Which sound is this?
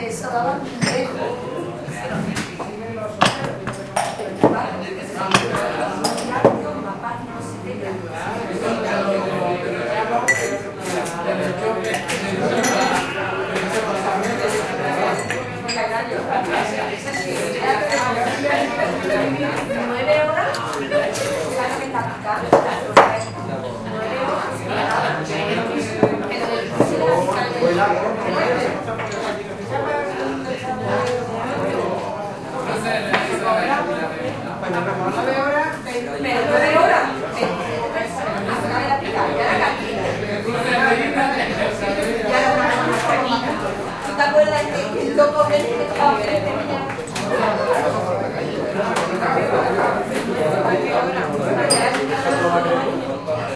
people having their beers in a pub, glasses, ice cubes, music in background. Edirol R09 internal mics